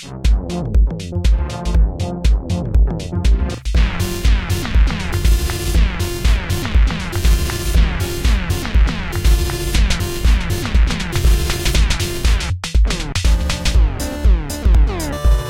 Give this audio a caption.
Laser Like Beat 2
A beat that contains laser-like sounds.